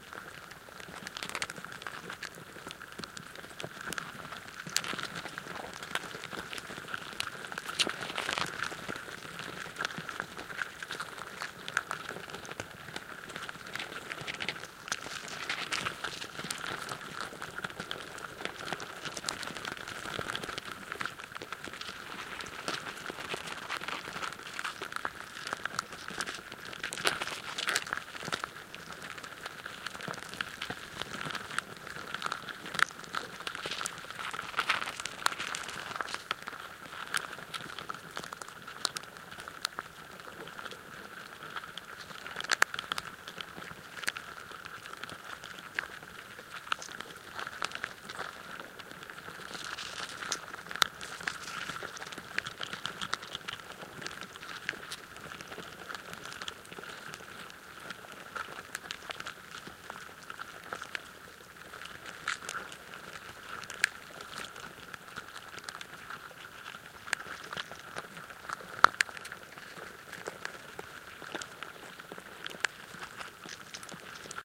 A recording of a thatching ant mound on Hornby Island, British Columbia, Canada. It was made with a homemade stereo contact microphone created with scavenged parts found at the Hornby Island Free Store. Two piezoelectric mic elements were attached to a single strip of cedar and set down on top of the ant mound. The ants swarmed it, producing this interesting sound.